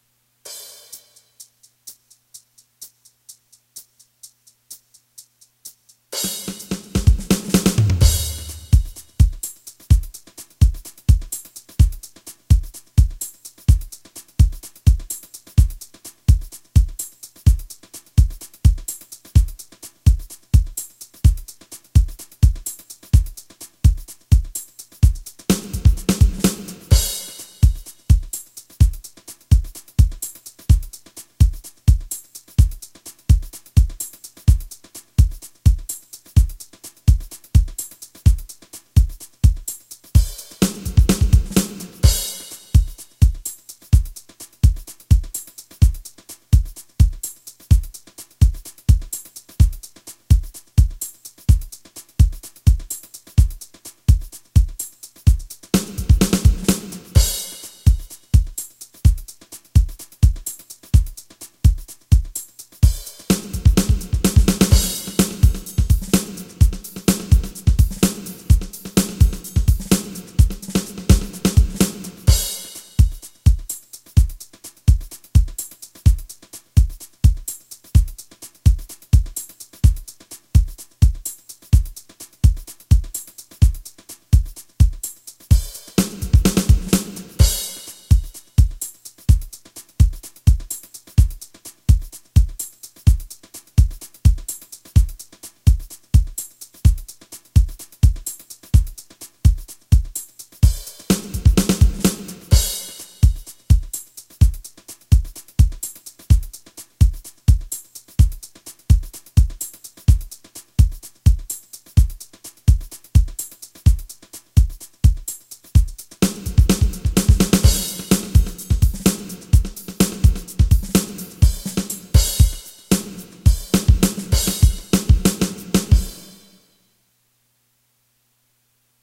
News Beat
Thought this would make the perfect, very unique style to use drums as filler background music during a very important statement or speech. This track is a little over two minutes long and has just enough soft beats to NOT distract the listener to what is being said.
This was recorded using a Yamaha keyboards GuitarPop style drum rhythm, Audacity & a little improvisation. I must admit...I like what I hear and I think - SO WILL YOU!
No acknowledgement to me is necessary but maybe a shout-out to Yamaha would seem in order.